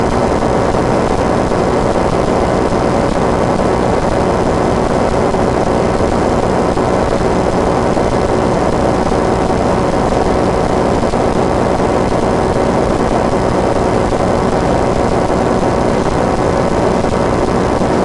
battery noise
I took an RCA to 1/8 inch cable and plugged it into my sound card input. The sound is created by sticking the two RCA plug ends to a 9 volt battery's + and - terminals... I don't know if the noise comes from the battery or the computer, though.